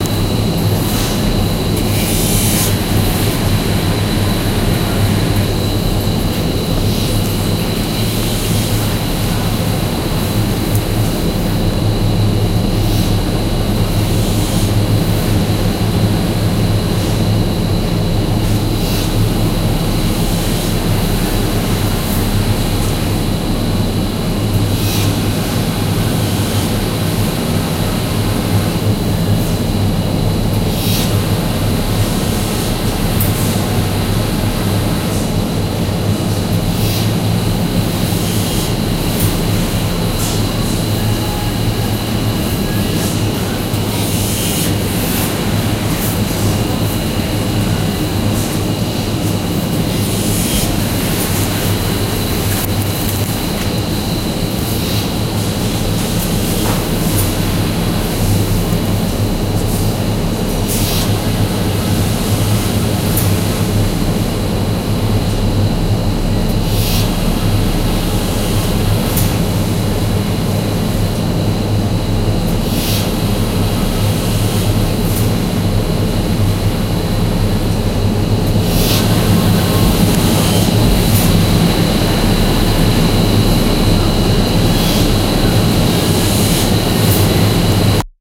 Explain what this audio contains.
Weird noises of a refrigerated shelf in a local grocery store.
Recorded with a Roland R-05.
electric, fan, industrial, machine, noise, refrigerator, weird